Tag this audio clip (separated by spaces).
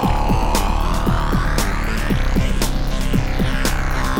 keyboard drums